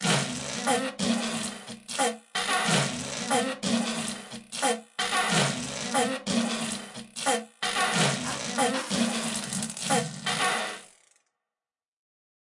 delphis FART SONG 91 BPM
Own farts composed in a small mix.... Its a loop with leave reminder!!!
91bpm, fart